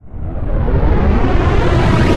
This is a highly flanged passing wash sound to use in jingles